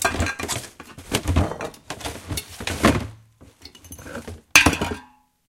Rummaging in closet
clatter, objects, random, rumble, rummage